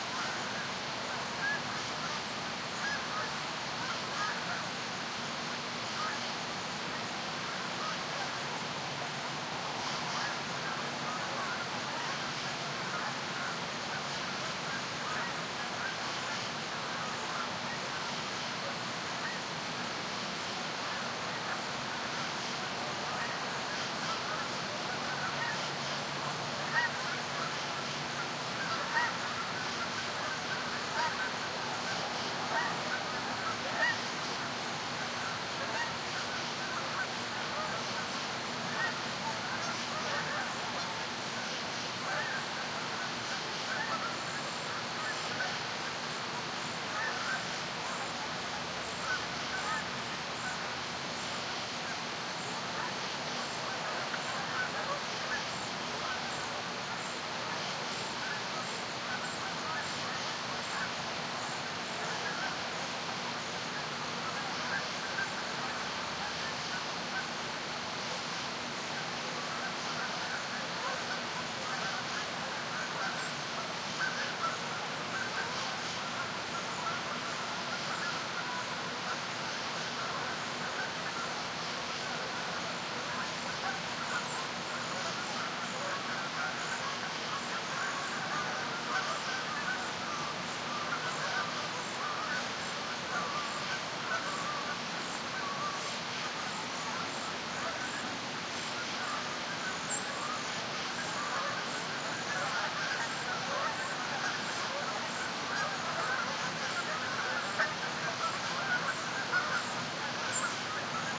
geese copake farmland 1
Rural ambience with Canadian geese near the hamlet of Copake Falls, in rural upstate New York, USA, in October, at dusk. Geese gathering and calling to one-another in the distance in a harvested field. Crickets and other nocturnal insects chirping in the nearby vicinity. In the distance, the rapids of a stream and far-off highway traffic.
Recorded with a Sony PCM-D50 with 120-degree mic pattern (wide stereo imaging). High-pass EQ applied.
(1 of 2)
geese, new-york, copake, columbia-county, ambience, farmland, rural, evening, ambient, crickets, canadian-geese